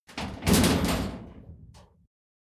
small-metal-hit-13
Metal rumbles, hits, and scraping sounds. Original sound was a shed door - all pieces of this pack were extracted from sound 264889 by EpicWizard.
bell, blacksmith, clang, factory, hammer, hit, impact, industrial, industry, iron, lock, metal, metallic, nails, percussion, pipe, rod, rumble, scrape, shield, shiny, steel, ting